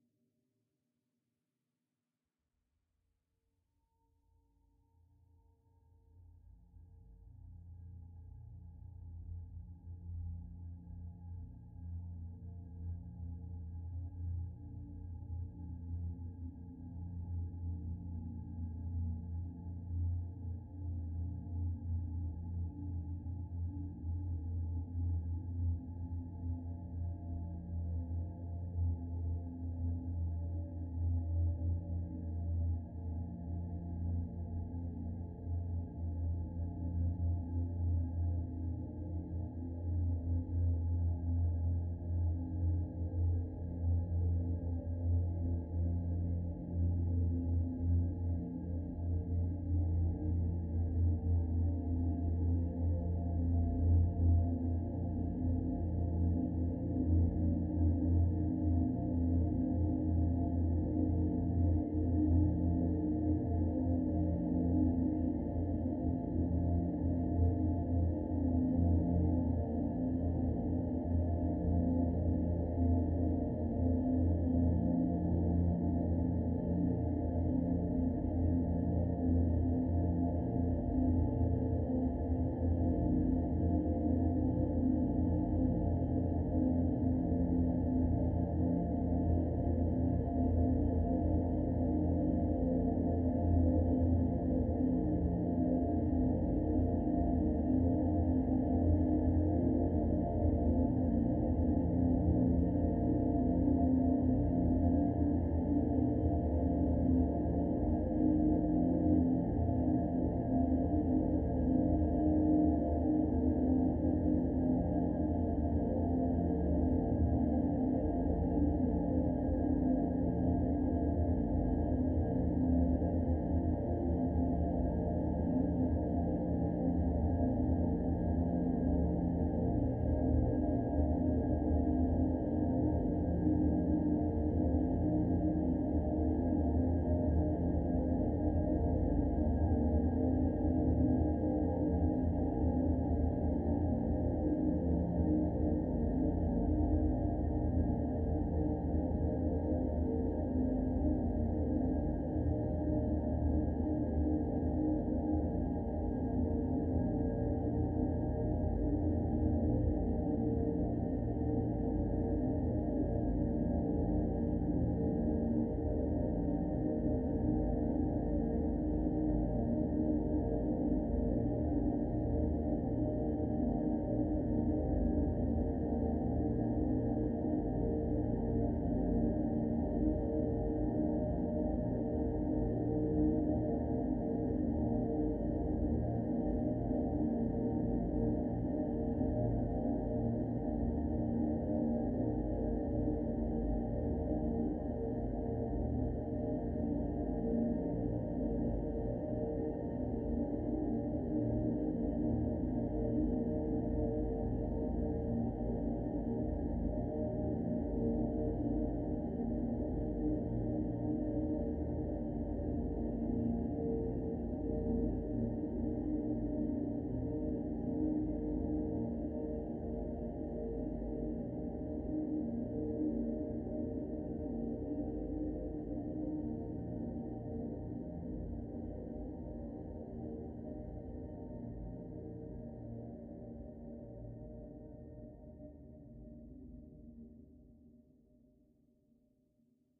LAYERS 019 - ALCHEMIC DREAM DRONE was created using Camel Audio's magnificent Alchemy Synth and Voxengo's Pristine Space convolution reverb. I used some recordings made last year (2009) during the last weekend of June when I spent the weekend with my family in the region of Beauraing in the Ardennes in Belgium. We went to listen to an open air concert of hunting horns and I was permitted to record some of this impressive concert on my Zoom H4 recorder. I loaded a short one of these recordings within Alchemy and stretched it quite a bit using the granular synthesizing method and convoluted it with Pristine Space using another recording made during that same concert. The result is a dreamscape drone. I sampled every key of the keyboard, so in total there are 128 samples in this package. Very suitable for soundtracks or installations.